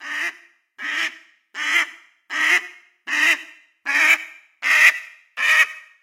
Recording of a screaming duck.